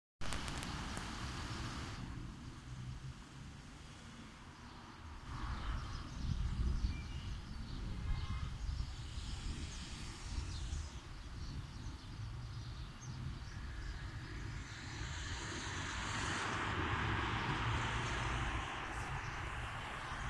Street sounds
Bus, Cars, City, Road